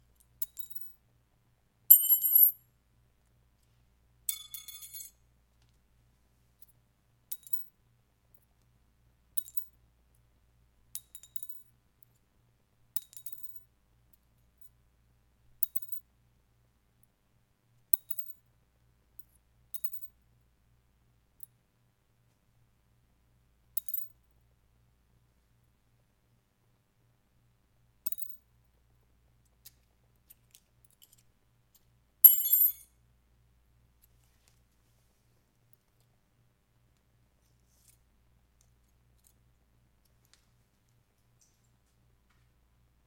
I was not happy with the actual shell casing form the pistol that my ex stepdad shot up the living room with and I have no spent AK shells so I set about looking for alternative sources of the "ejected shell hitting the street" sound. This is a hand cuff key, a suitcase key and a metal guitar pick.